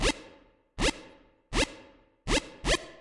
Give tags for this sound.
arcade
8bit
session
live
wobbler
synth

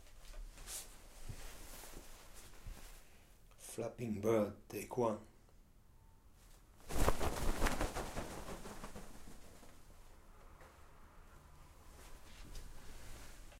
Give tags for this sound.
flapping
mono
bird

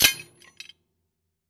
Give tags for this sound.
work
angle-iron
2beat
metalwork
80bpm
metal
labor
one-shot
ring
chain
bright
tools
crafts
steel

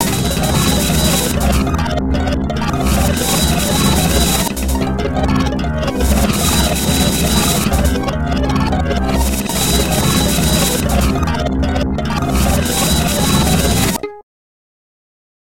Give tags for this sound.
knows
she
electrovoice
multi

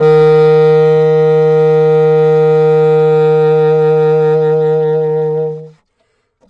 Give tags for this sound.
jazz
saxophone
vst
alto-sax
sax
woodwind
sampled-instruments

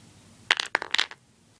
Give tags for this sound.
block
crash
drop
hit
impact
wood
wooden

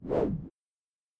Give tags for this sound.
axe
swing
waving

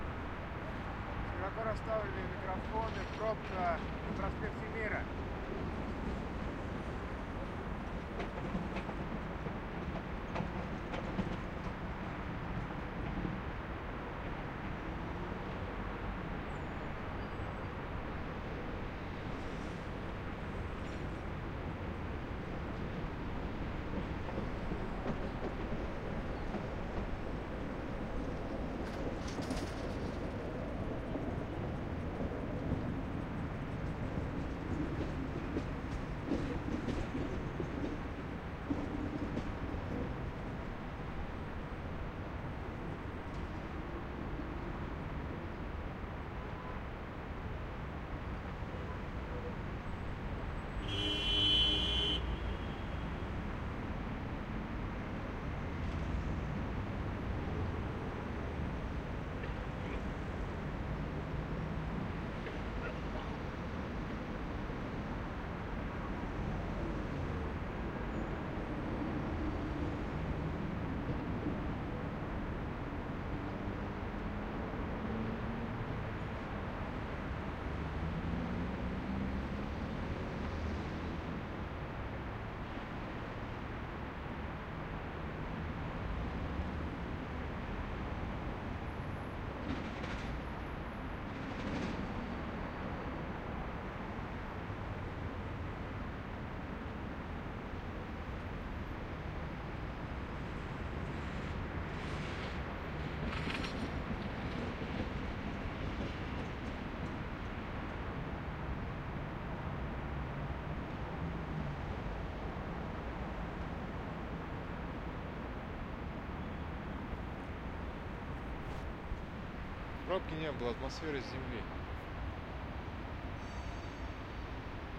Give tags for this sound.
field-recording
heavy
mira
moscow
prospect
traffic

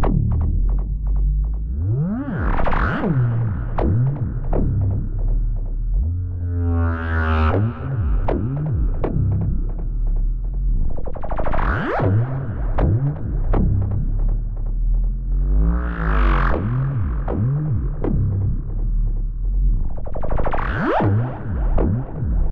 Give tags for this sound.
Song
Electronic

